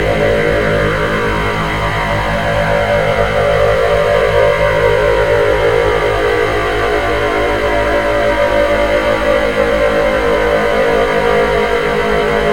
Electric noise 01
The curve has been draved in Audacity and edited
android, automation, computer, droid, electronic, machine, mechanical, robot, robotic, space